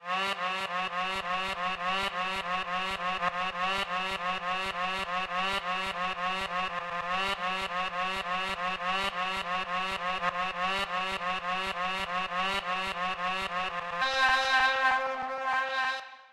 Noise Siren 1
effect, weather, LFO, trap, Dub, Siren